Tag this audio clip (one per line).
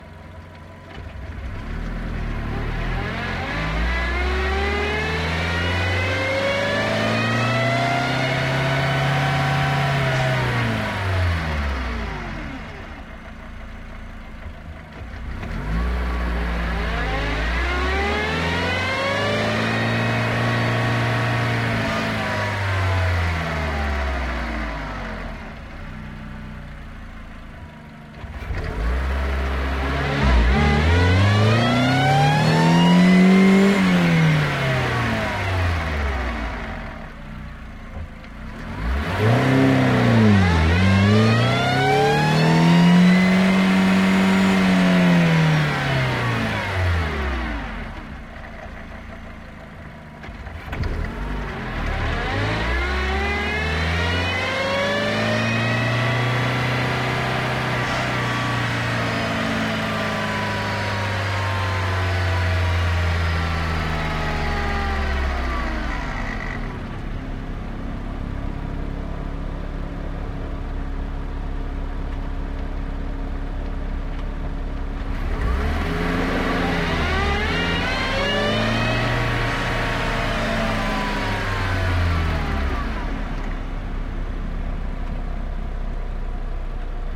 auto
automobile
drive
gear
golf
mobile
reverse
road
street
traffic
volkswagen